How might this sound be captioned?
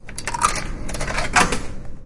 It sound was recorded in the bar of UPf-Campus poblenou.
We can percive the sound of the coins entering in a coffee machine.